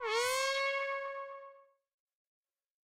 Short sound effects made with Minikorg 700s + Kenton MIDI to CV converter.